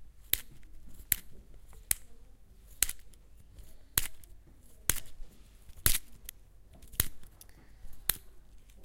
mySound MES Moha
Barcelona, Mediterrania, mySound